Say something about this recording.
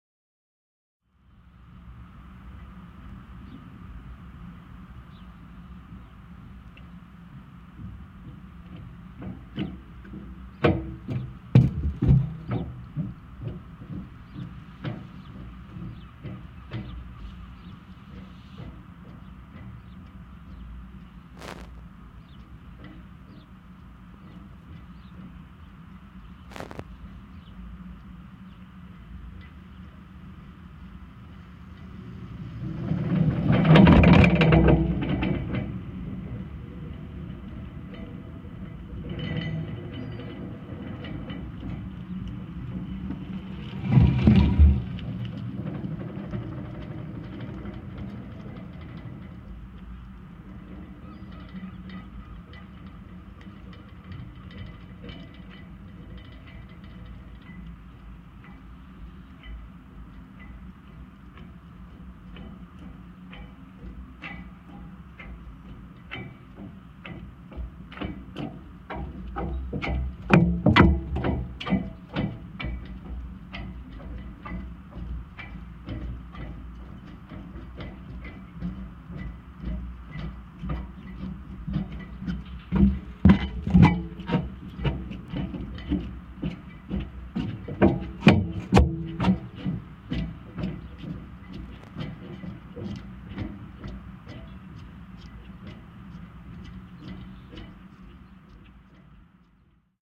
Contact Mic Newport Footbridge Floor 01
southwales, footbridge, vibration, rumble, newport, bridge, contact, contactmic, eerie